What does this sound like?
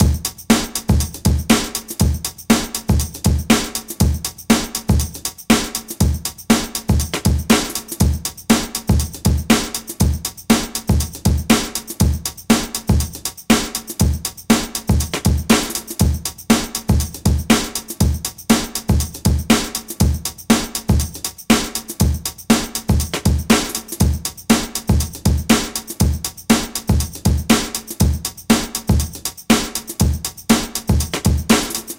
breakbeat with fills. Drum loop created by me, Number at end indicates tempo